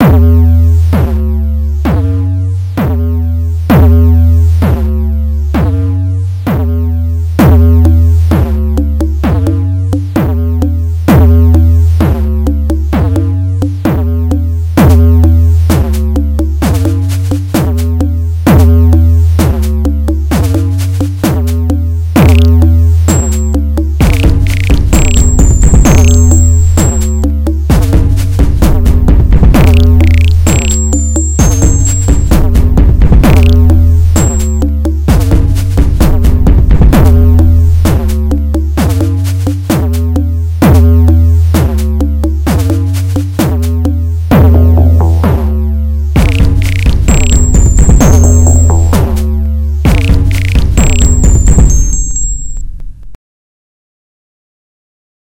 Creepy drum loop
African-resemblant drum loop
loop, drum, distorted